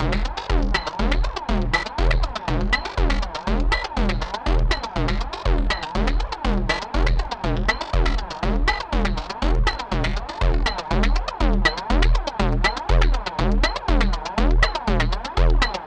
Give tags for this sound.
120bpm Loop Distorted Percussion Zero

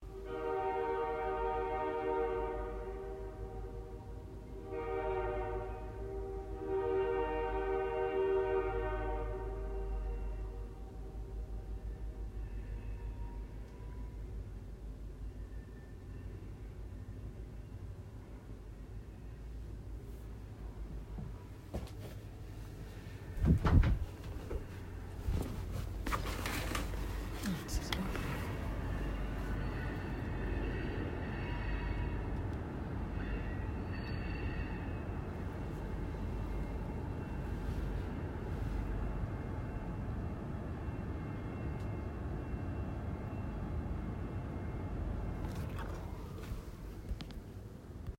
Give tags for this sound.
locomotive railroad train whistle

